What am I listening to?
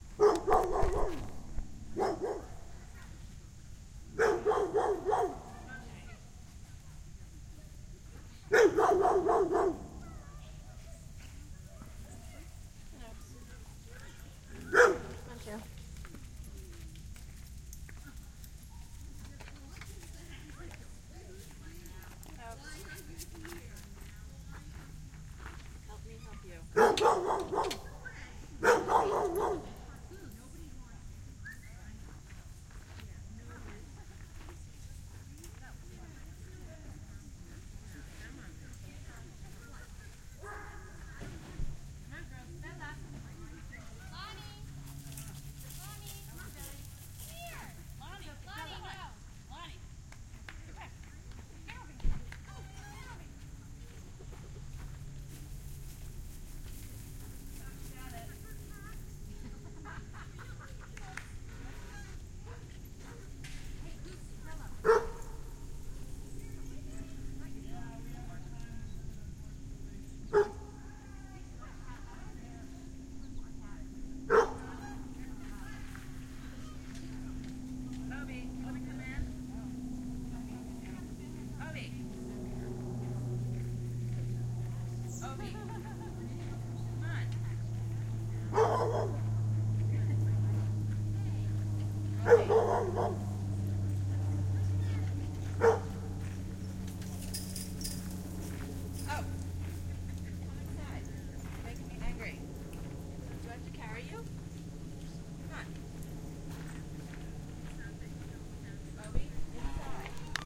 This is a ambient sound at a dog park on South Mountain Reservation in New Jersey. People can be heard talking and opening and closing the fence.
Two Primo EM172 Capsules -> Zoom H1